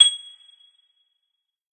This is part of a multisampled pack.
The chimes were synthesised then sampled over 2 octaves at semitone intervals.
chime, metallic, one-shot, short, synthesised